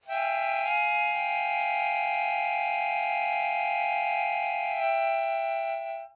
Chromatic Harmonica 6
A chromatic harmonica recorded in mono with my AKG C214 on my stairs.
chromatic, harmonica